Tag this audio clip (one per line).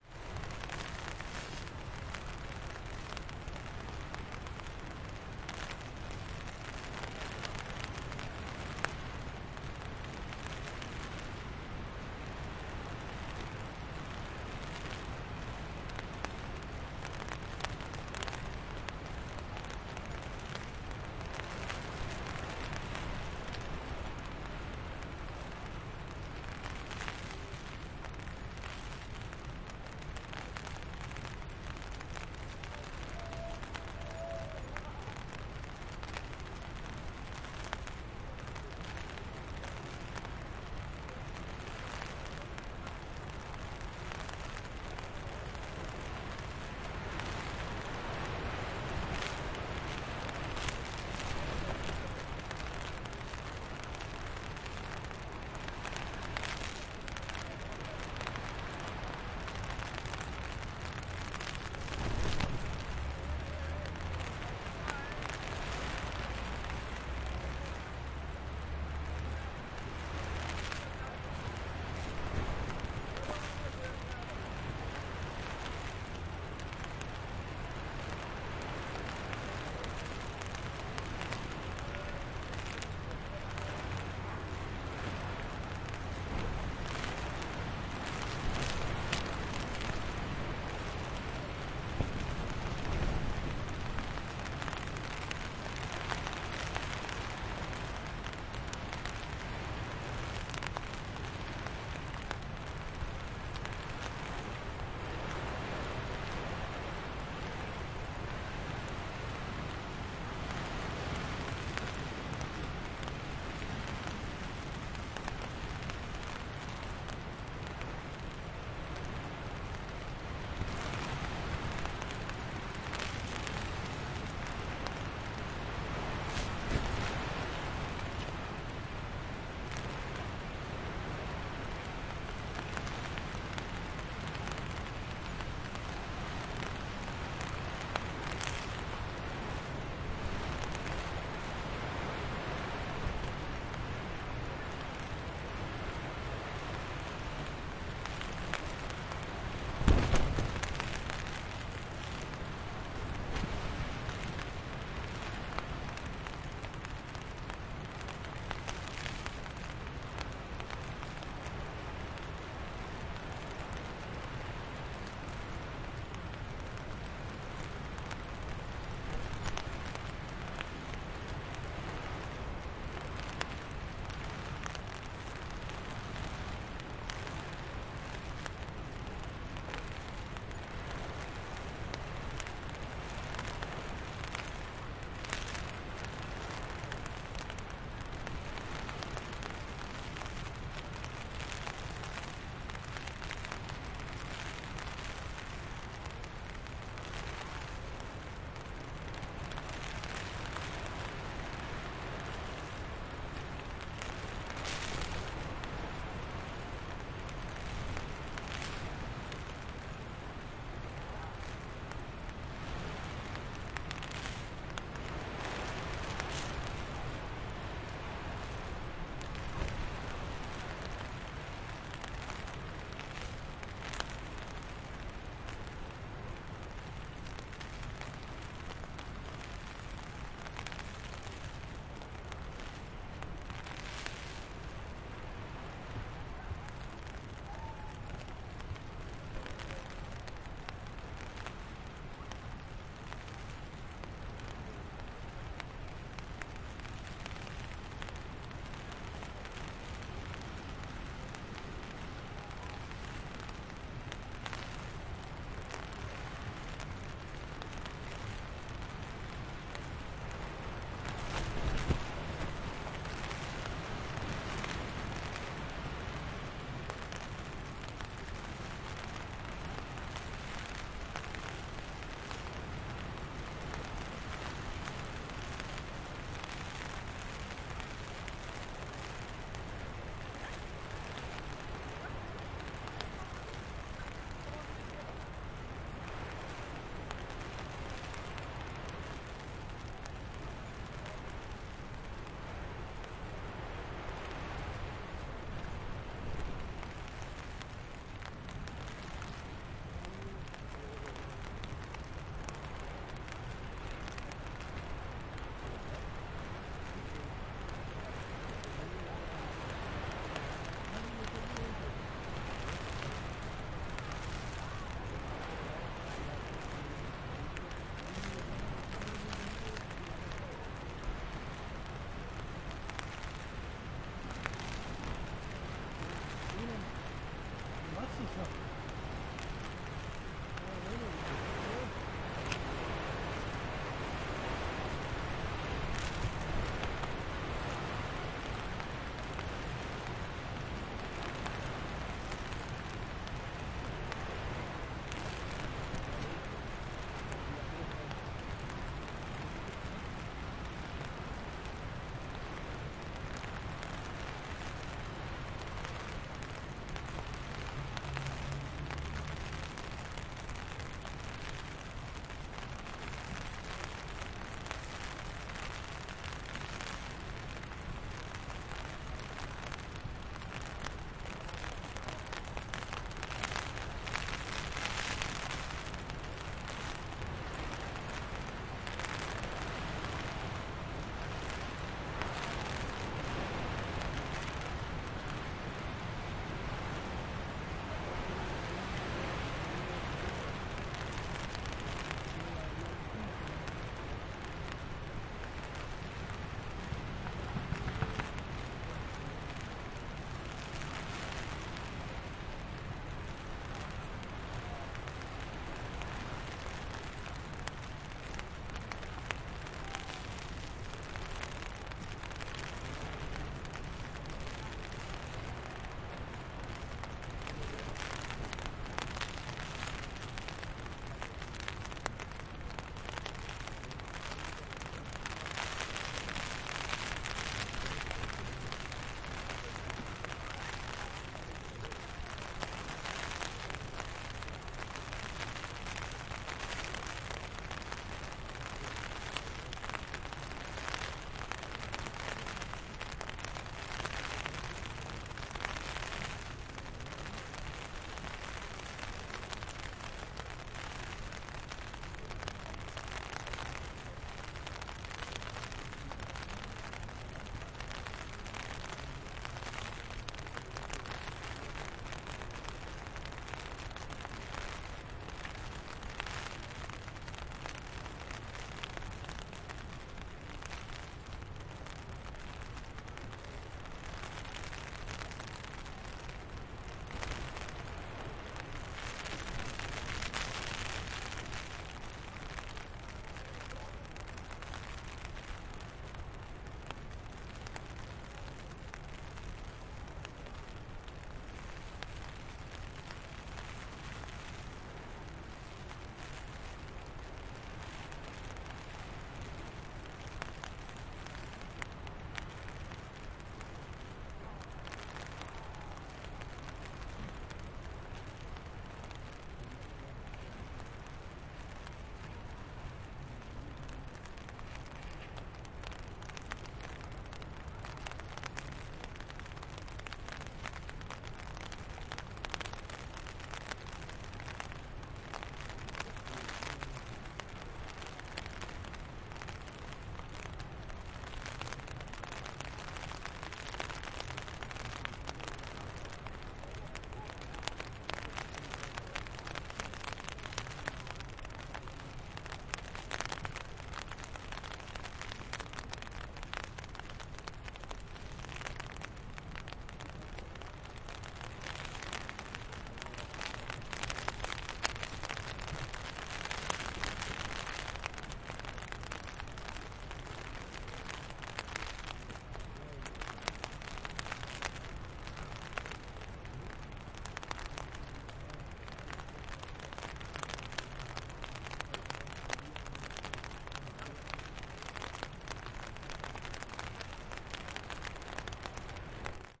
camping
inside
ambience
rain
campsite
water
field-recording
soundscape
surface
tent
plastic
storm
wind
stereo